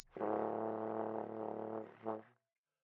One-shot from Versilian Studios Chamber Orchestra 2: Community Edition sampling project.
Instrument family: Brass
Instrument: OldTrombone
Articulation: buzz
Note: A#1
Midi note: 35
Room type: Band Rehearsal Space
Microphone: 2x SM-57 spaced pair